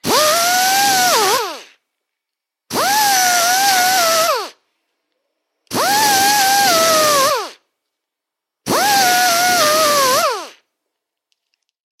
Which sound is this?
Hempstead Ata Hemel st24le straight die grinder grinding wood four times.